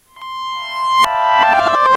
sound effect i made from an ipad app
sfx, funny, sound, games, effects